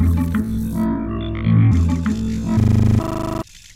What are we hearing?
Some IDM In fruity loops.... Yay
Mostly taking made drumloops and melodies and changing the time and pitch to miniscule amounts.